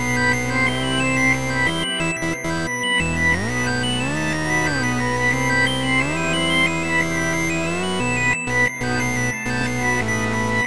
electronic Xmas bell mixed with strong bass